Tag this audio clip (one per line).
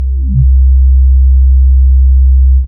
bass
multisample
ppg
sub
subbass